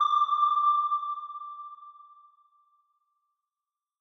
archi sonar 02
I created these pings to sound like a submarine's sonar using Surge (synthesizer) and RaySpace (reverb)